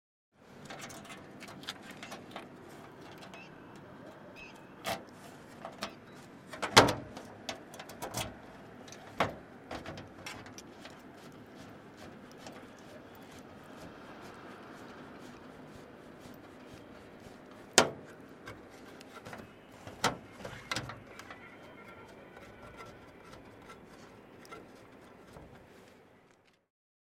Auto Rickshaw - Engine Cabinet (Back) Sounds
Bajaj Auto Rickshaw, Recorded on Tascam DR-100mk2, recorded by FVC students as a part of NID Sound Design workshop.
Auto, Autorickshaw, India, Ric, Richshaw, Rick, Tuk